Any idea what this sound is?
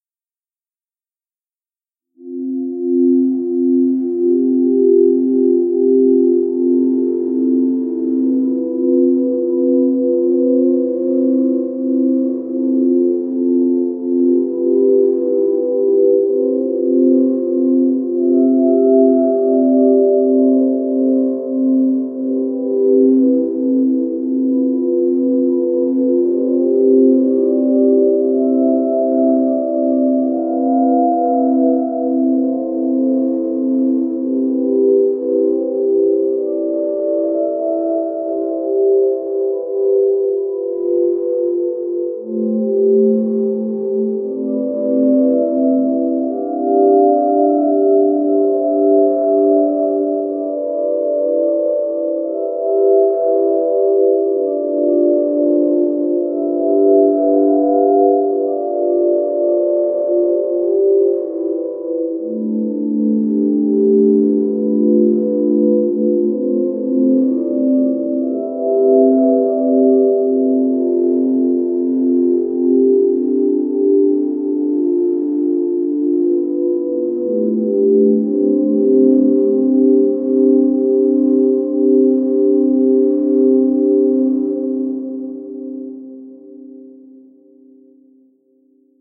Celestial melodies using Helm synth and sequenced with Ardour.